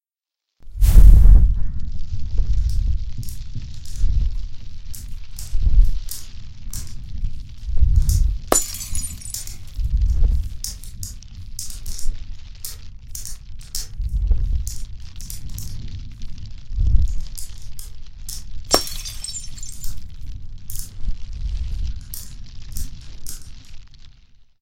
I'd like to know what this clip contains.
Car-Burning
Layered sound made from clothes, plastic bag, blow, glass crash, handclap, white noise, plastic glass. Recorded with AKG 2006 mixed in Audacity.